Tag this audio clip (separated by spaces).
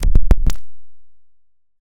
electronic soundeffect